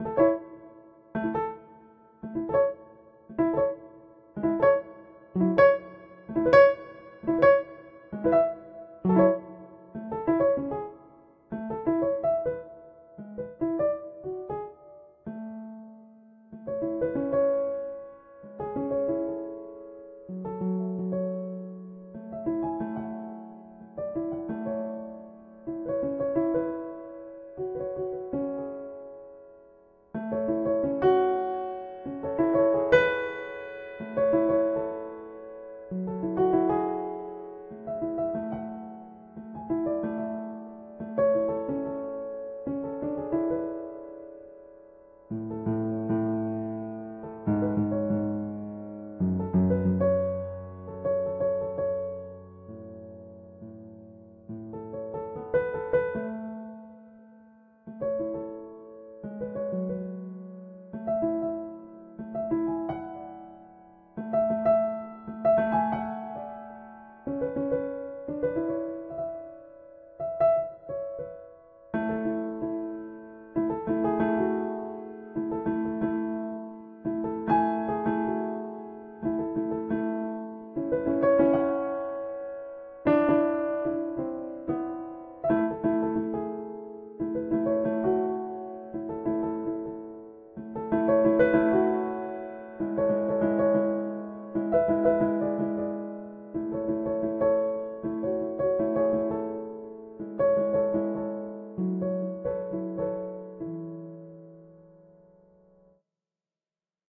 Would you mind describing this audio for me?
Ambient soft piano music.
Made using
• M-Audio Oxygen 61
• FL Studio
• Independence VST
I'm fine if you use this in a for-profit project, as long as you credit.